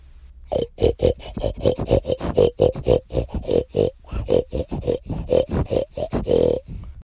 A person making pig noises
animal, noise, noises, OWI, pig